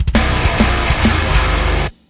Short Metal Intro / Fill
Another Metal Intro.. or fill.. call it how you want it and use it how you want it to (except explict sexual content).